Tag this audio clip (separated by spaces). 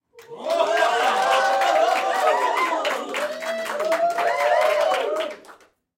group whoo ooo crowd audience reaction romance